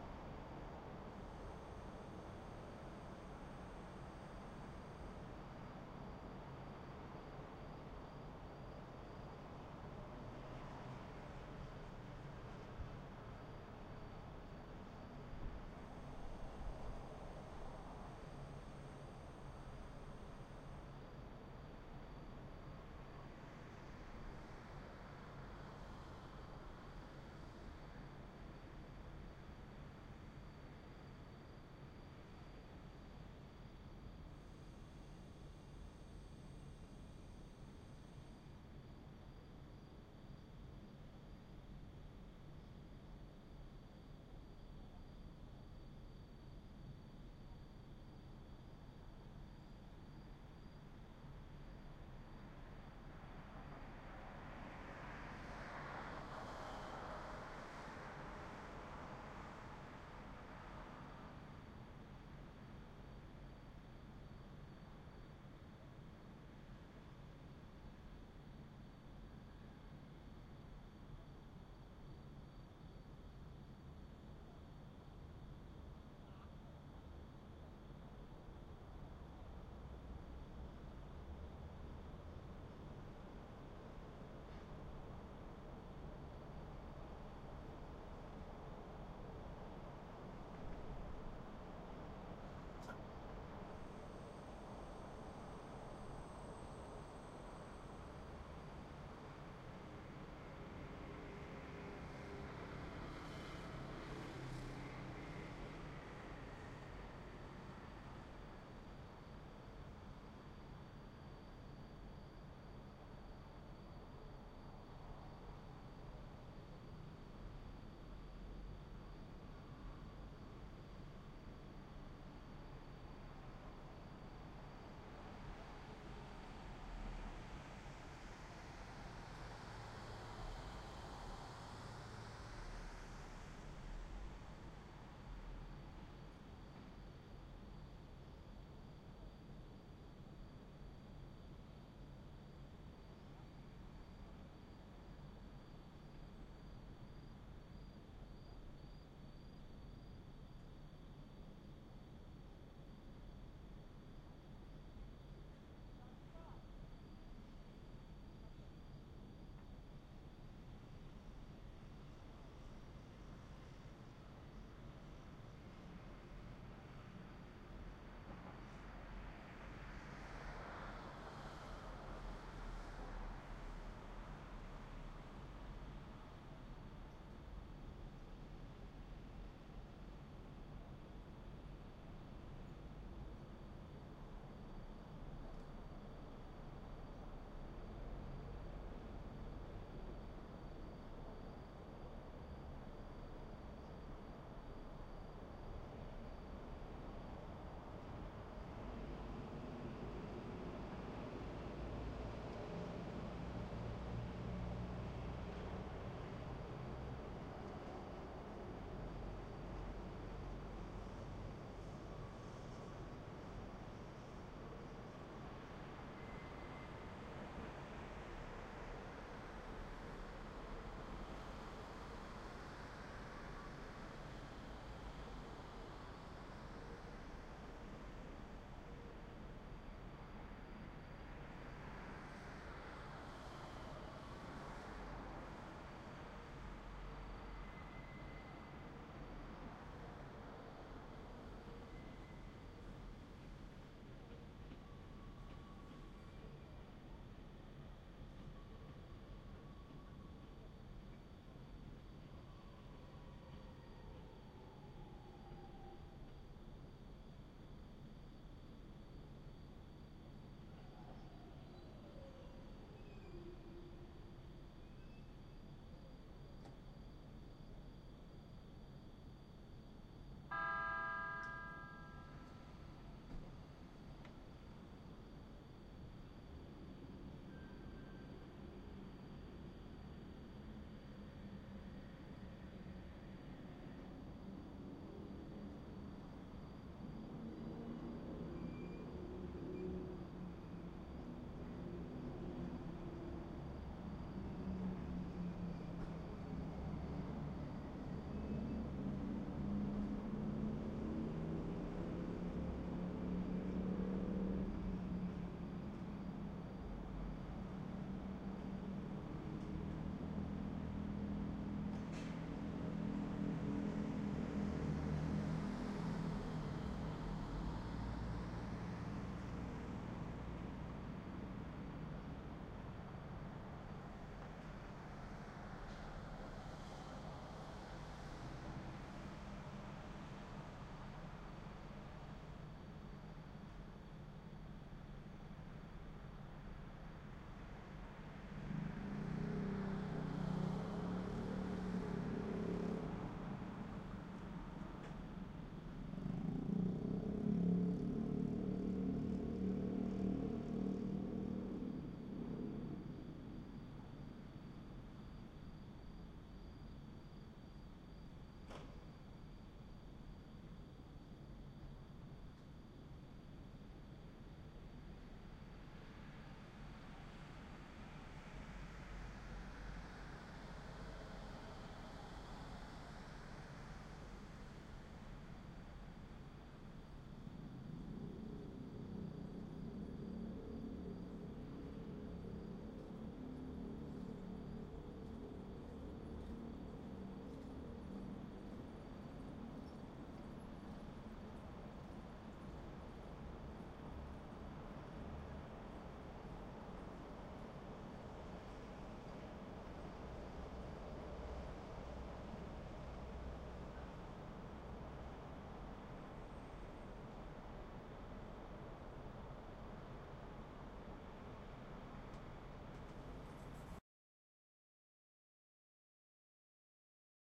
Tokyo outdoor night ambience. This is a recording of the Shinagawa area of Tokyo after 1:00 AM. Crickets can be heard chirping in the distance, as can cars on a usually busy road. The trainstation is closed, but there is still some activity that can be heard as well as wind and other night ambient sounds. Recording was made on the 6th floor of a highrise apartment behind Shinagawa station. 2 mics placed at a 120 degree angle were used. No filtering was used. The only editing done was removal of the beginning and end of this clip.
ambience, background, cars, city, cityscape, crickets, field-recording, japan, night, shinagawa, tokyo